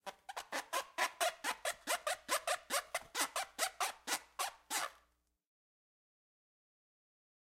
mp balloon sounds
balloon, squeak